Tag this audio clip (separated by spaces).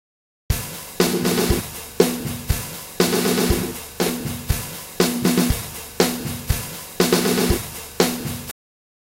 120 4 bpm drum fuzzman loops music